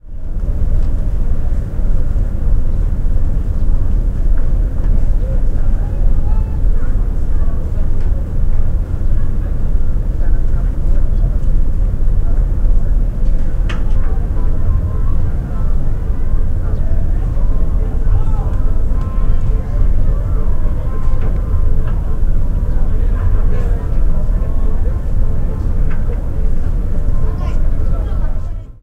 20070624 084125 drone boot bali
On a ferry to Bali. Java, Indonesia. drone 1/3
- Recorded with iPod with iTalk internal mic.
drone, ferry, field-recording, indonesia